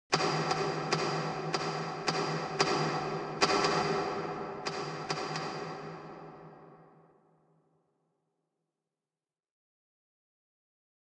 I took ted the trumpets switch and added an echo to make it sound like a fluorescent light turning off in a warehouse.
a sound requested by jcharney: "a sample of fluorescent lights shutting down... that crazy slamming noise of large numbers of them"
composited in VEGAS to sound like a lot of them being turned off.